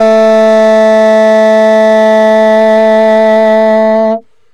Alto Sax a2 v115
The first of a series of saxophone samples. The format is ready to use in sampletank but obviously can be imported to other samplers. I called it "free jazz" because some notes are out of tune and edgy in contrast to the others. The collection includes multiple articulations for a realistic performance.
vst, saxophone, sampled-instruments, alto-sax, woodwind, sax, jazz